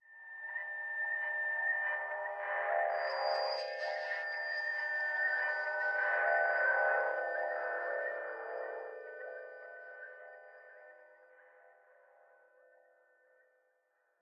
Remix parts from My Style on Noodles Recordings.
grime, parts, remix